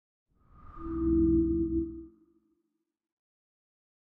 Electronic Wind
panning, echo